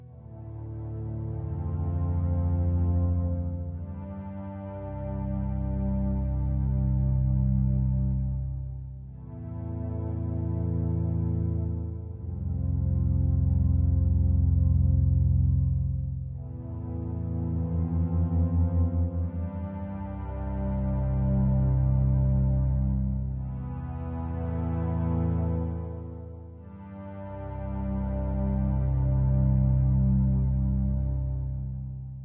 A somewhat orchestral sort of sound, created with Nord Modular synthesizer.